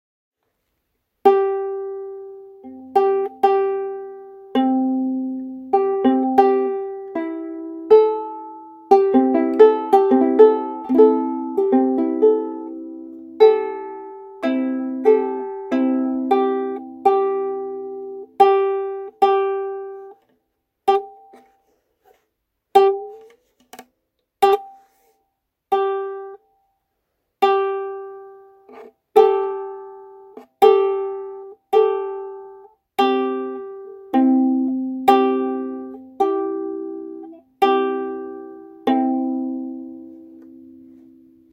playing around and strumming a ukelele
field-recording, music, ukelele